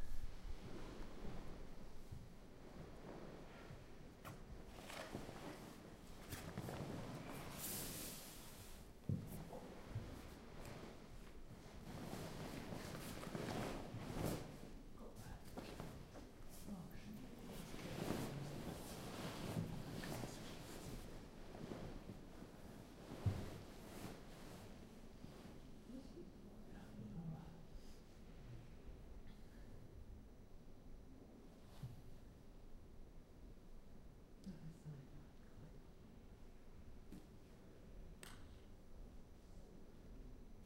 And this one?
STE-004 turrell slideroom bean bag
being visitor to james turrell exhibtion in wolfsburg. the room is a temporary slideroom with two big screens and bean bag to sit on. fieldrecording with zoom h2. no postproduction.
exhibtion, germany, field